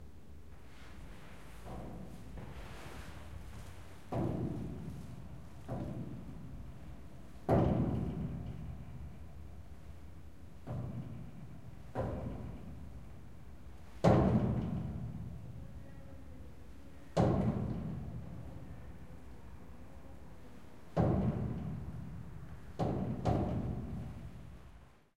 Staircase metal rumble
rumble, impact, metal